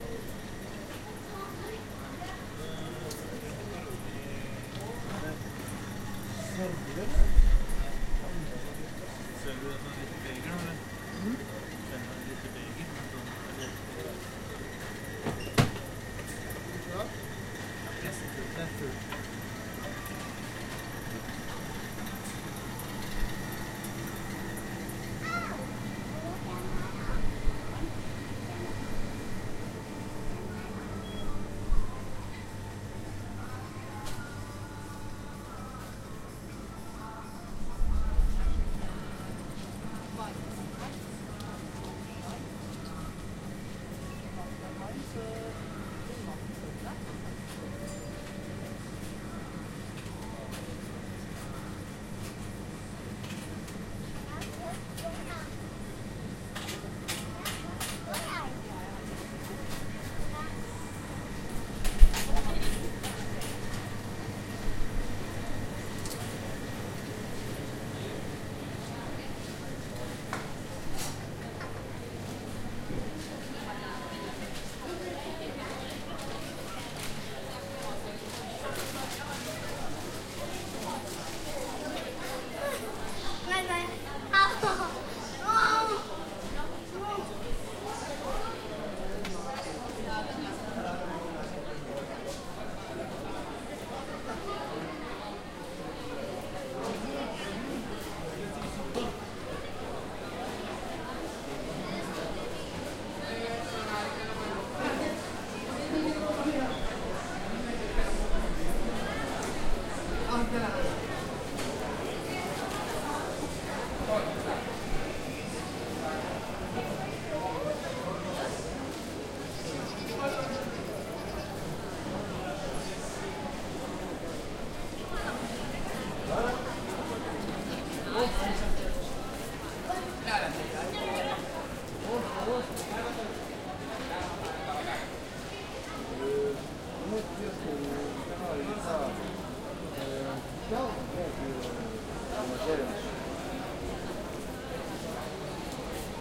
Field recording from Oslo Central Train station 22nd June 2008. Using Zoom H4 recorder with medium gain. Moving slowly around main concourse.
atmosphere, norway, norwegian, oslo, train-station